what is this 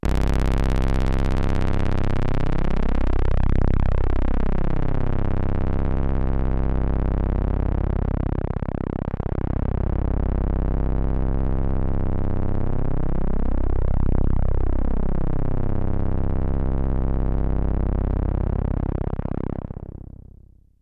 Mopho Dave Smith Instruments Basic Wave Sample - OSCDET C0
basic, dave, instruments, mopho, sample, smith, wave